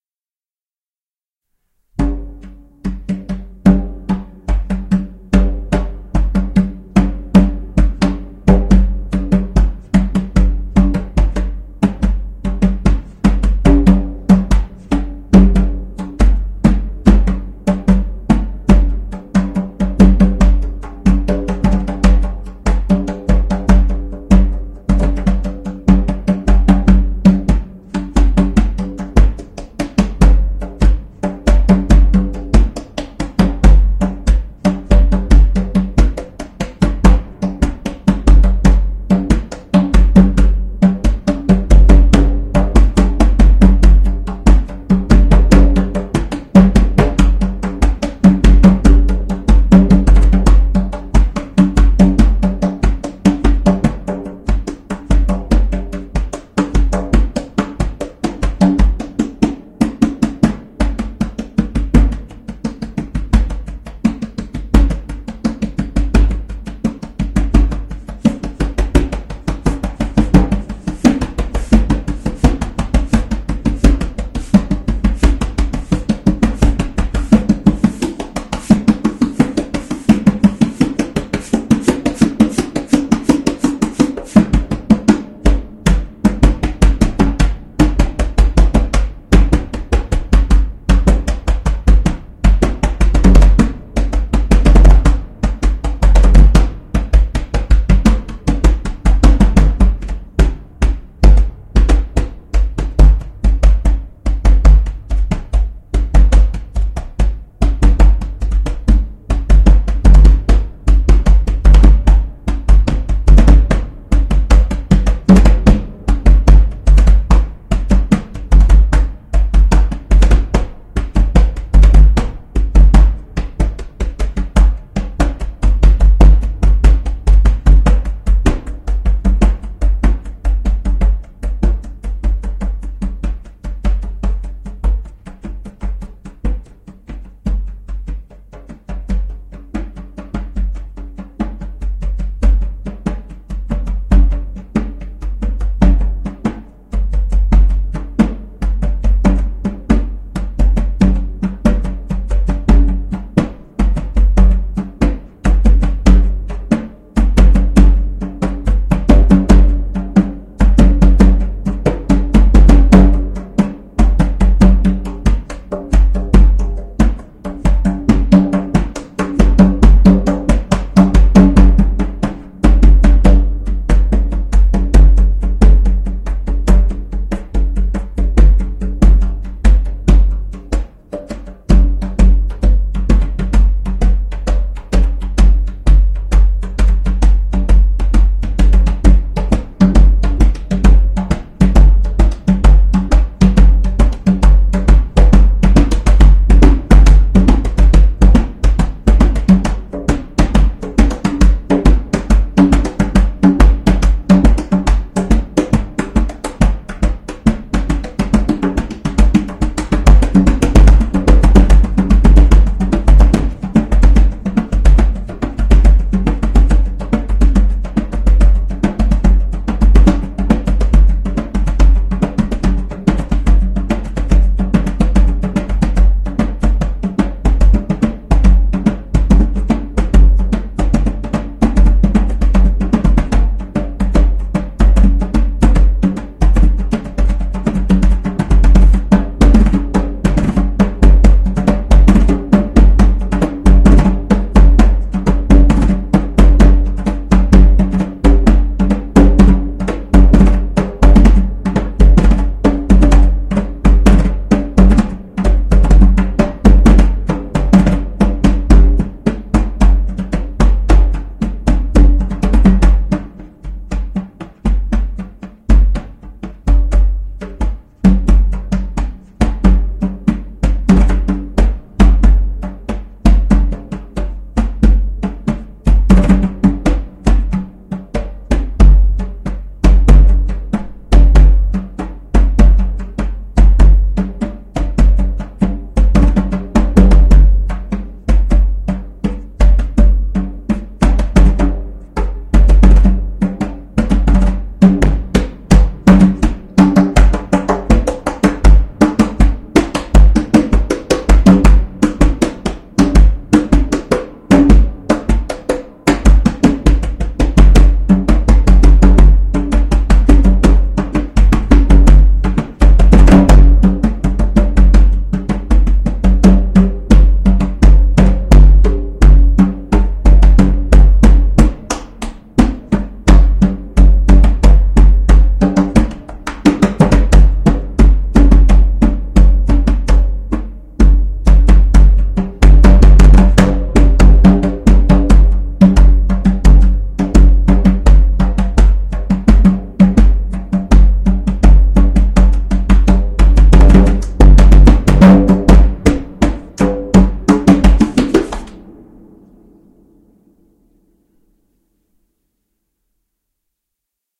Djembe Improvs Jan 2019
A djembe played by myself improvisationally. Sound has been modified by myself.